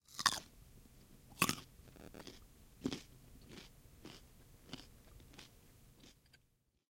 person eating a carrot
carrot, chewing, eating